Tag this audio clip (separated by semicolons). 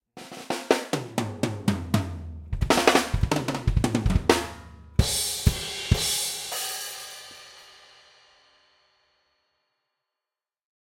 acoustic; break; drumkit; drums; fill; metal; punchy; rock; roll